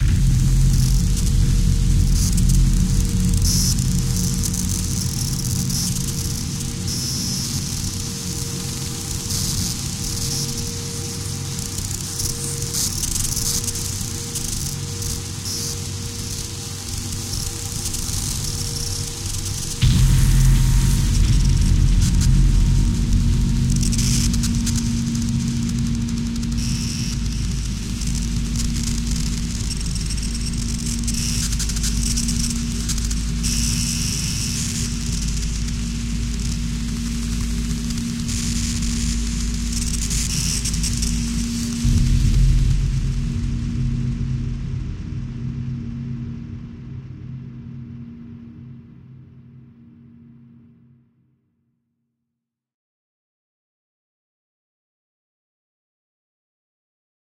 Cosmic Interference
Your spacecraft has just malfunctioned. Communication is down. You are now stranded in deep space, yet an unfamiliar interference seeps its way through your carrier. Who, or what is it?
Made using an AM Radio, AudioMulch, and Absynth5.